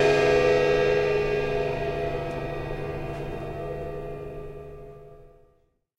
cymb lowgog
a percussion sample from a recording session using Will Vinton's studio drum set.
cymbal, studio, gong, hi, crash, percussion